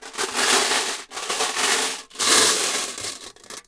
Swirling glass mancala pieces around in their metal container.